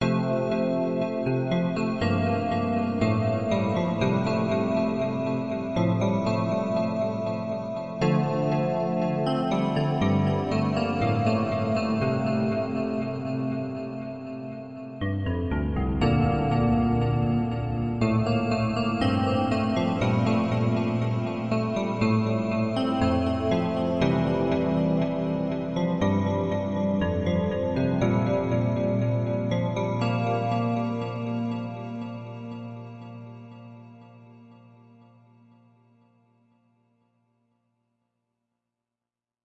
SnS EP Rhodes
The melody for Soundswaves and Sureality played on an EP Rhodes3. Created using Mixcraft Pro Studio 7.